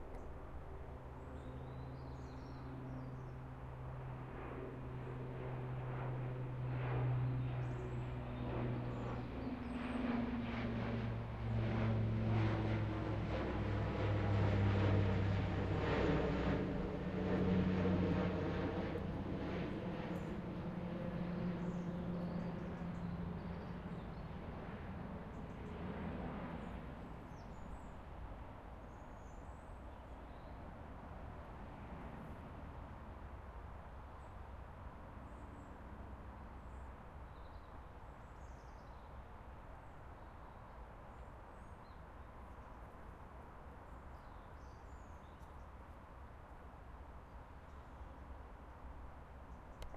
Woodland-Birds-Propelled-Airliner
Woodland ambience and atmos with distant traffic as propeller aircraft flys overhead
Nature, Woodlands, Birds, Aircraft, Flyover, Propeller